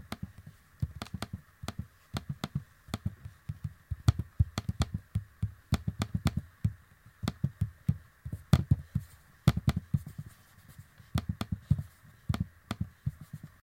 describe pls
Klikání na touchpad
windows, touchpad, computer, machine